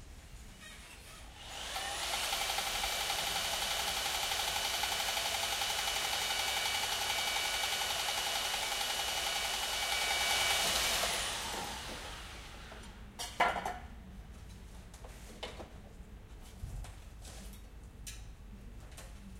Stonesaw cutting rooftiles at a construction site. Recorded with Marantz PMD670 with AT825 from some 4-5 metres distance. I think I adjusted the volume a little near the end of this part of the recording, but it's the only pass of the saw in my recording that is not too much disturbed by passing cars. Unprocessed.
environmental-sounds-research, field-recording, saw, stone, stonesaw